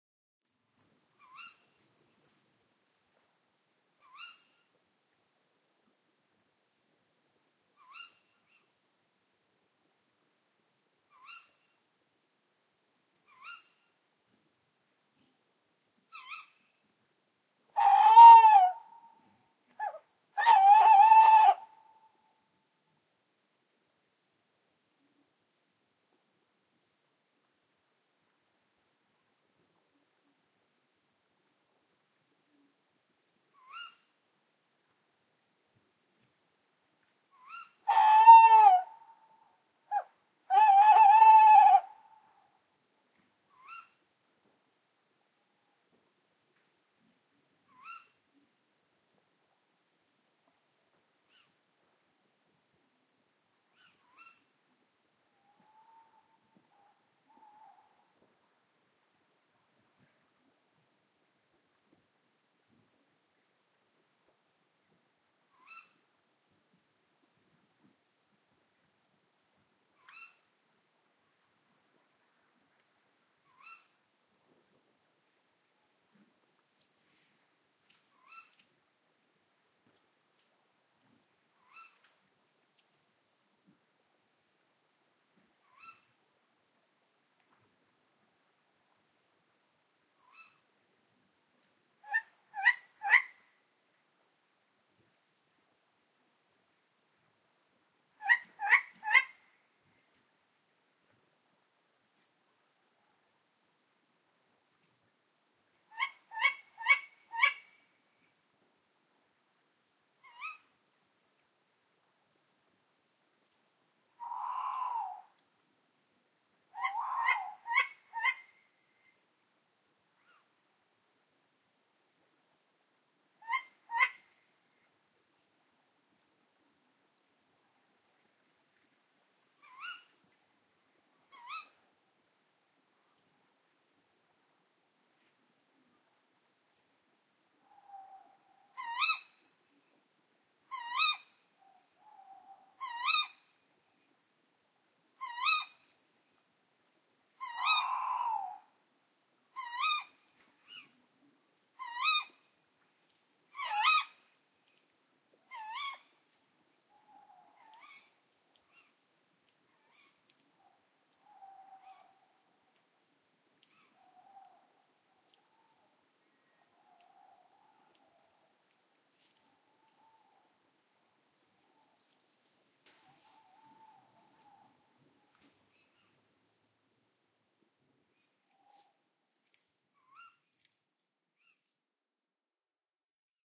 A stereo field-recording of male and female Tawny owls (Strix aluco) hooting and screeching. Recorded at subzero temperature during the spring 2010. Zoom H2 front on-board mics.